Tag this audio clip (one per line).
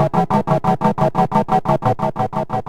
91
bpm
loop
synth